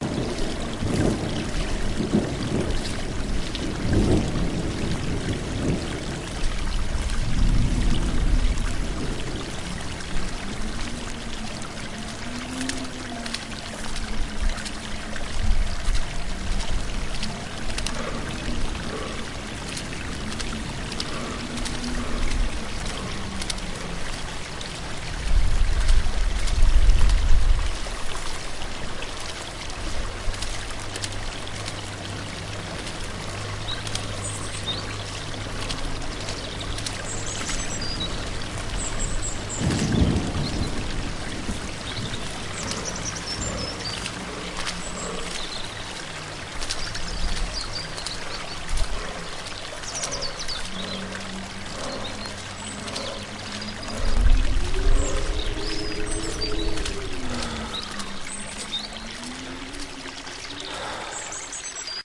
Lab 6 Soundscape Mus 152
Walking through a forest while there is a thunderstorm.
creek, walking